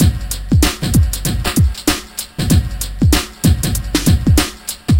Drumloop 96BPM - En marcha
This is a beat I made more than ten years ago (oh my god, how time flies!). "En marcha" is a way to say in my native language (Spanish) "let's go". I chose this name because this beat inspires me a lively attitude to go forward. And funny (because the 'swing' or 'shuffle').
This drumloop is a mix between a variation of a famous Jungle beat and some basic drum elements.
Hope you enjoy it!